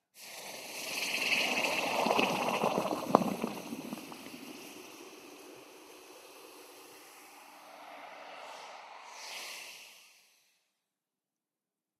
Red hot steel out into water bucket, water boils and steel gets cold.